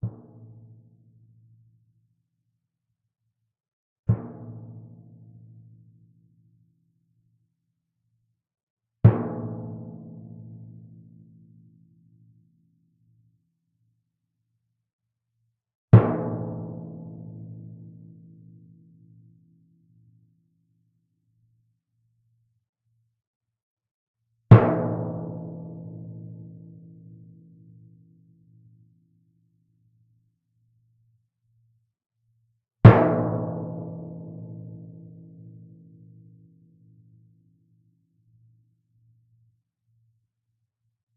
timpano, 71 cm diameter, tuned approximately to A.
played with a yarn mallet, about 1/4 of the distance from the center to the edge of the drum head (nearer the center).
drum, drums, timpani, flickr, percussion